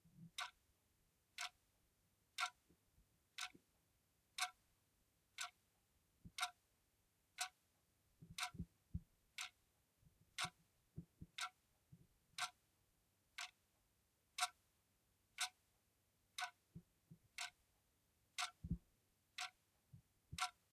The tick-tock of an electric clock (has audible mic artifacts)
tick-tock, clock